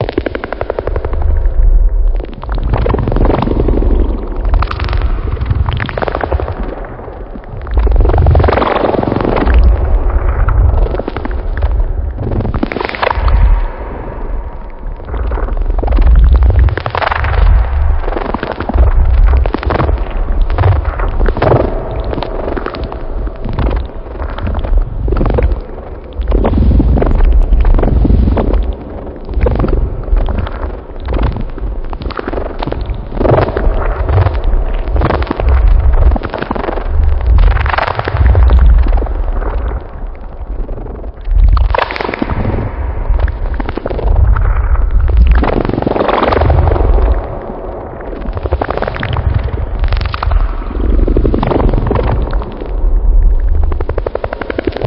Mechanical Sea Monster 1
An experimental bass sound that emulates a robotic sea monster.